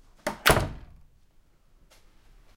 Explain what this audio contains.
garage door shutting
Shutting the door from the house to the garage.
click, wooden